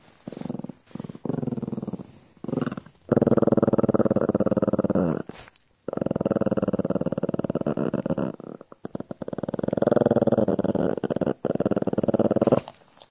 my dog growling
this is my dog bella growling at… something. I never looked to see what she was growling at.